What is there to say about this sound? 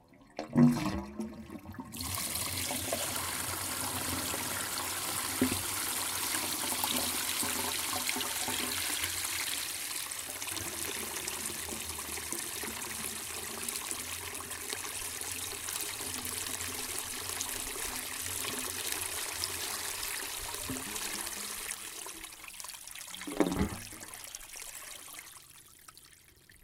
Household Draining System Exterior
Household draining system. Plastic drainpipe. Exterior.
Drain, Exterior, Household, Plastic, Draining, Drainage, Water, Drainpipe